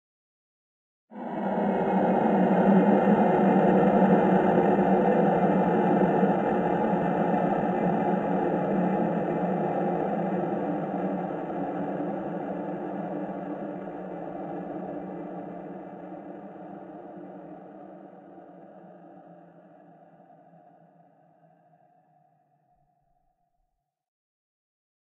Eerie Landscape Background Sound
This is a background sound for a creepy landscape imaging.
soundscape, creepy, background